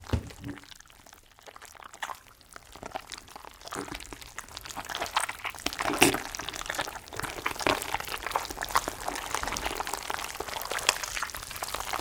Pumpmkin Guts 1
Pumpkin Guts Squish
guts, pumpkin, squish